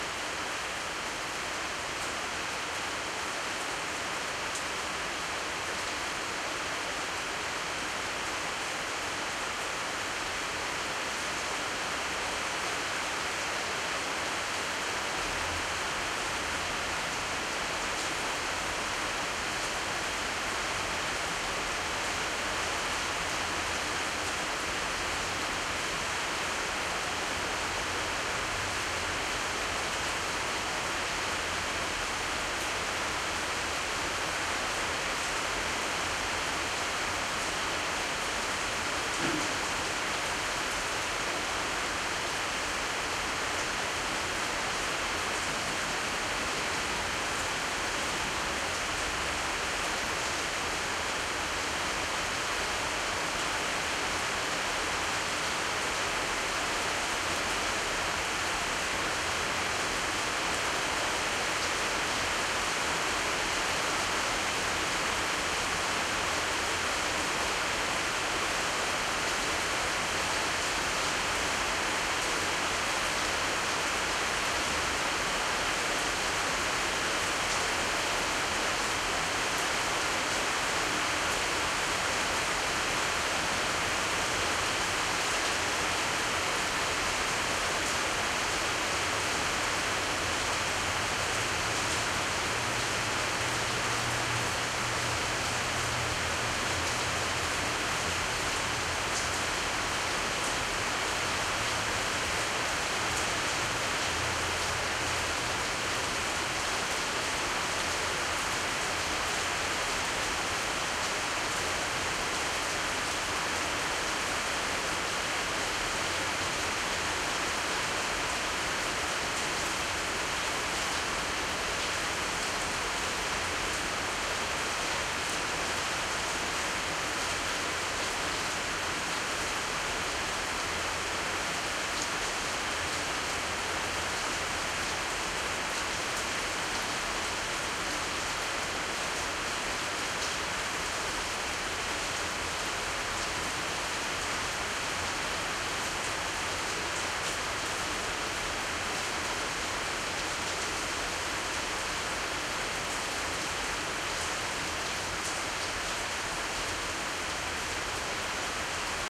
Rainfall recorded in Barcelona early autumn 2012
rainfall, outdoor-recording, SonyHXR-NX5